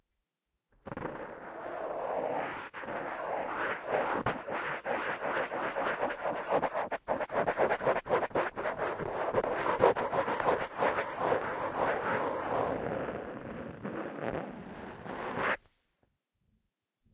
Foam Microphone Wind Screen 01
ASMR, foam, handling, pulling, scrape, scraping, scratch, scratching, touching